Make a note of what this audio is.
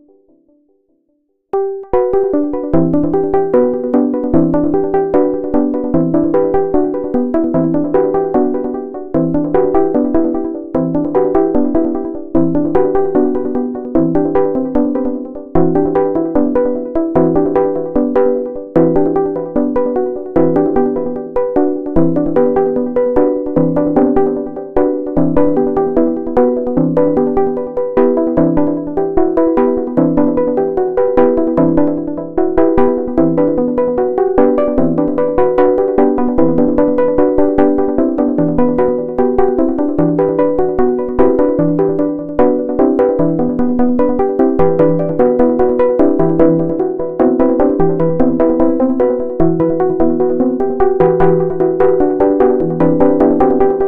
A thing I made from Otomata. It is a free sound maker.
pop, intro, tune